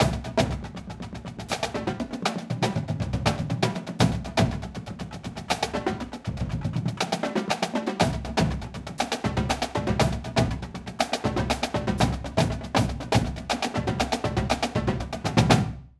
Action Percussion Variations (120 BPM)
Percussion for action or dramatic films. 120 BPM
120bpm, Cinematic, Drums, Action, Percussion, Film